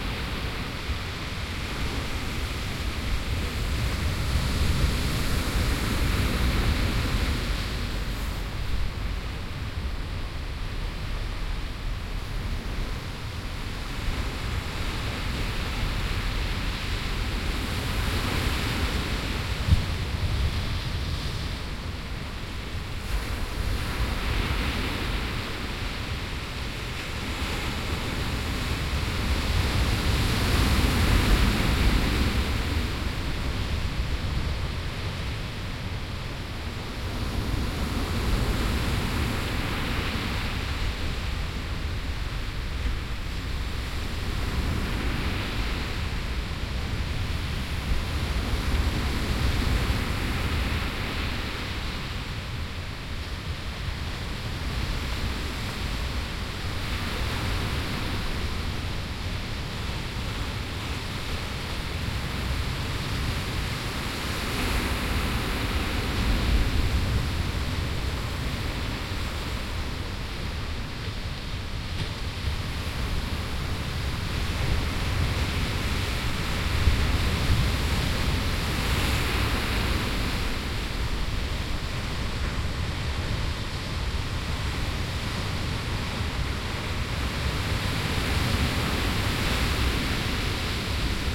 porto 22-05-14 waves during a storm, wind, walking
Breaking waves in a stormy day with wind, sand beach. Walking away with changes in soundscape